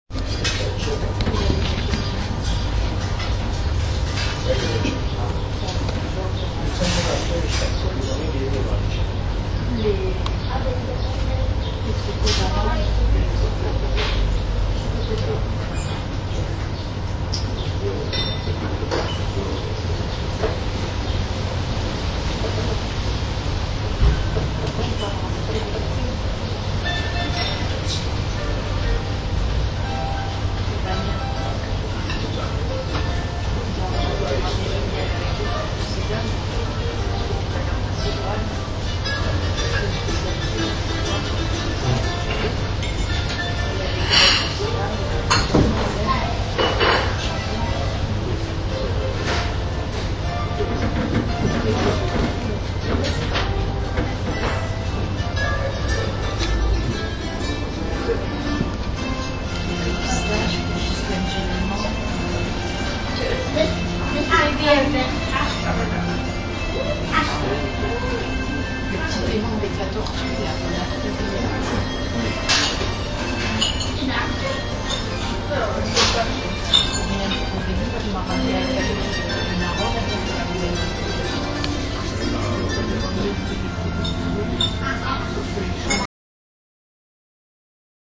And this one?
athens restaurant
greek, restaurant, athens